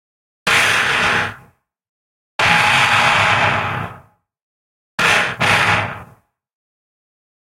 Breathing Industrial Game: Different breathing with some distortion. Sampled into Ableton using distortions like Trash2, compression using PSP Compressor2. Recorded using a SM58 mic into UA-25EX. Crazy sounds is what I do.